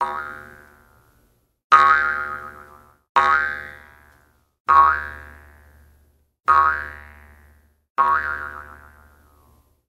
A couple of 'boing' sounds made using a jawharp.